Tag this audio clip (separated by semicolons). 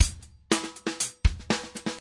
acoustic; drum; funk